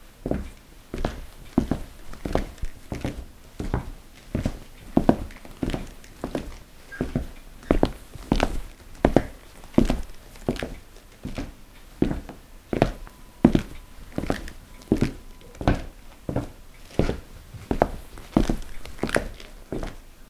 footsteps wooden floor loop
seamlessly loopable recording of footsteps on a wooden floor
floor, footsteps, loop, wood